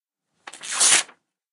tearing paper4
A single rip of a piece of paper.
rip, ripping, tear, tearing